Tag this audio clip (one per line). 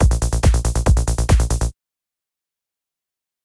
psytrance
goa-trance
trance
goatrance
psy-trance
goa
psy
loop